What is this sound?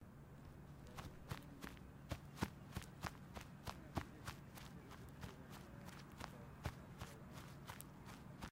grass footsteps fast
fast footsteps in grass